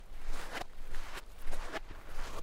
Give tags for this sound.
Hooves
Horse
Reverse

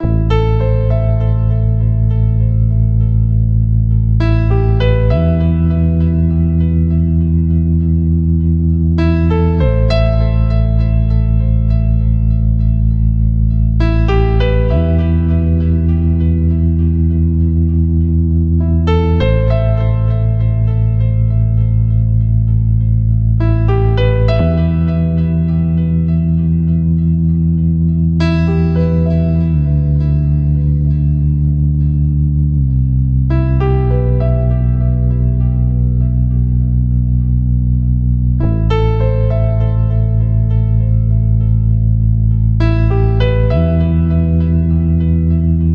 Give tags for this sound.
bass
dnb
electro
electronic
loop
piano
processed
slow
synth

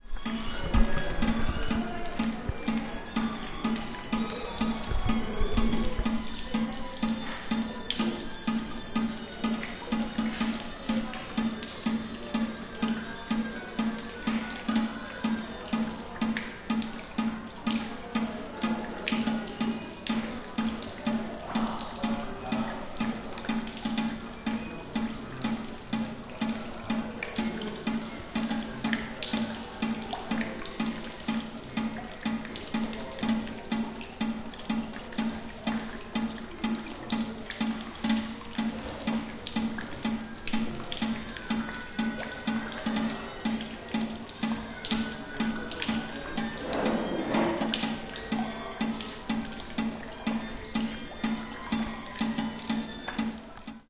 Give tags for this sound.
leak
esmuc

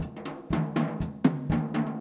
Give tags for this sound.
loop
drum

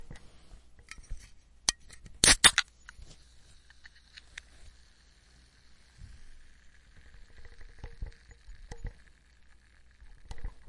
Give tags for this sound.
aluminum
beverage
can
drink
open
opening
soda
tin

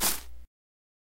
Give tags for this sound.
step
foot

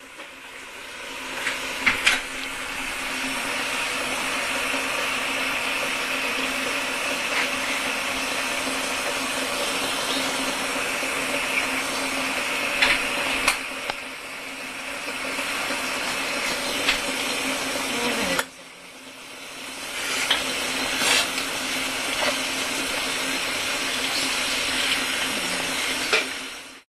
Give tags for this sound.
boiled
boiling
christmas
domestic-sounds
drone
field-recording
kettle
noise
water

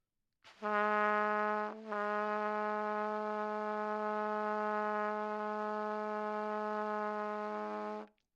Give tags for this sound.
good-sounds
Gsharp3
multisample
neumann-U87
single-note
trumpet

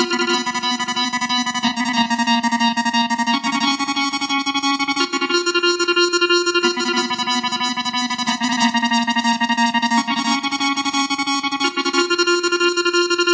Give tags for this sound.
synthesizer
synth
analog
arp

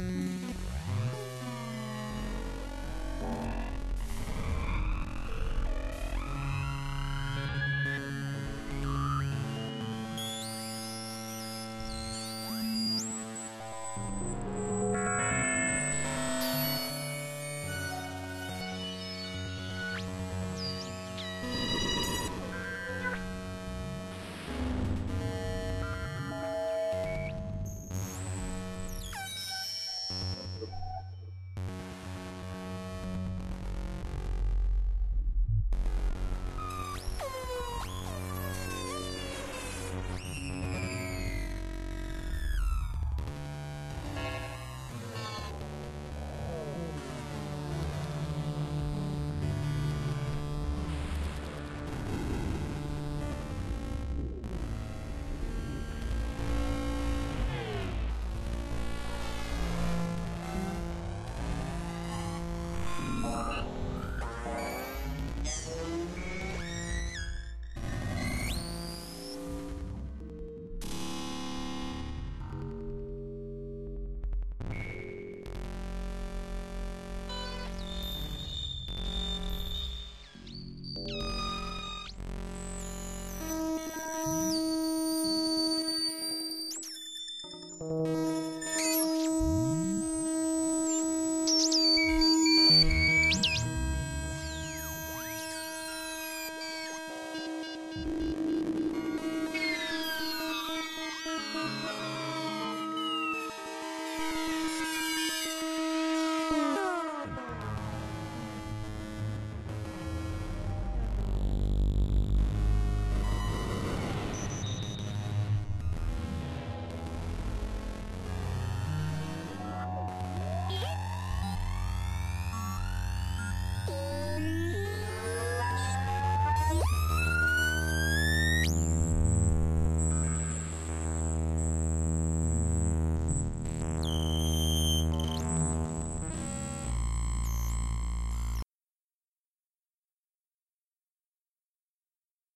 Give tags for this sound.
digital
echo
electronic
glitch
modular
noise
strange
synth
synthesizer
weird

